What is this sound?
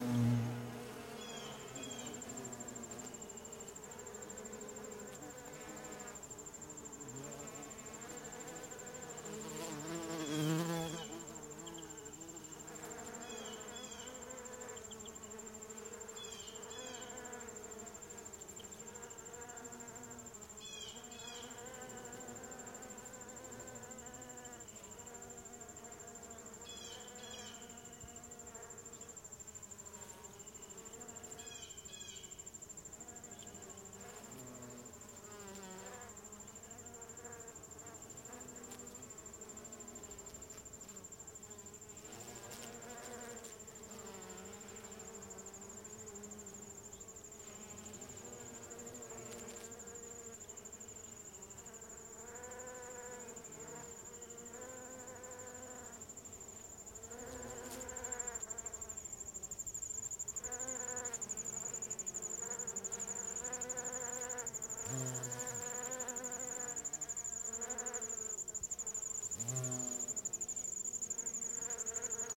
Bees Crickets Insects Birds
Bees and other insects in a flower garden with birds in background.
bumblebee, buzzing, insect